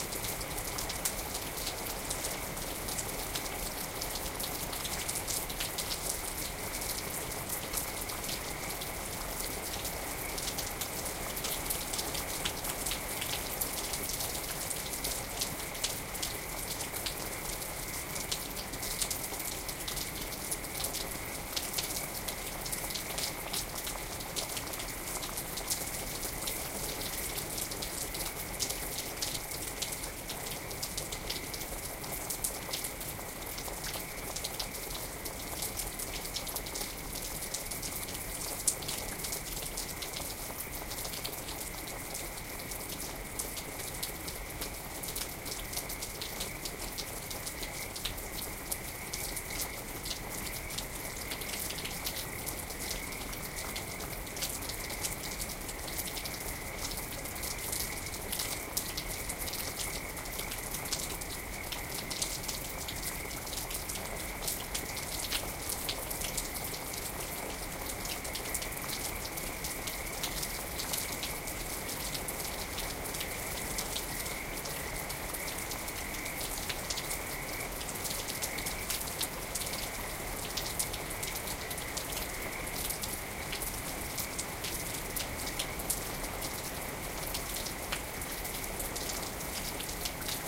rainfrog loop 1
Frogs sing in the pond as spring rain falls. This is the loopable version (the others will not loop well due to changes in wind volume). I didn't do anything special to loop this track but it seems to loop well on gapless repeat. Recorded with stereo omnidirectional mics placed on the windowsill.
nature, frogs, loop, rain, spring, field-recording, night